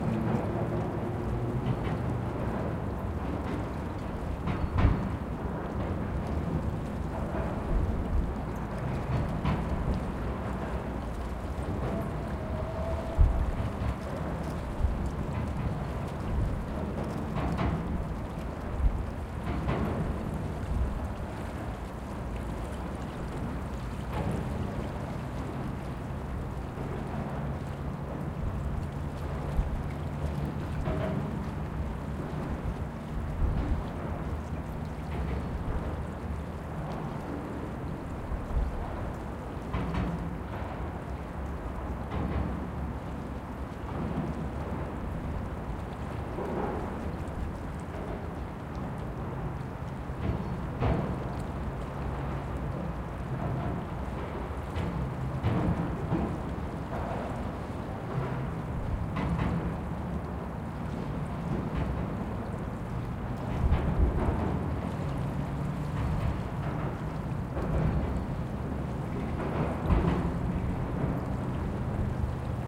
water under Leningradskiy bridge right-side 1
Water steam under Leningradsky bridge on the rigth river-side near bridge substructure.
Recorded 2012-10-13.
Russia, cars, river, rumble, water-stream